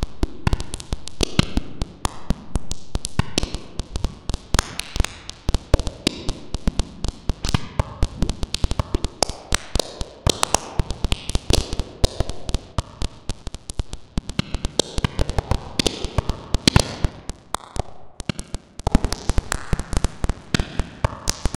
A series of synthesized sparks. From my Sparks sample pack.
electric, electro, electronic, glitch, noise, processed, spark, synth